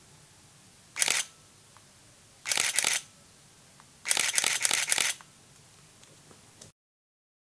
Camera taking shots :)